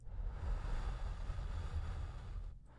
37 - Viento ligero
Viento ligero hecho con silbidos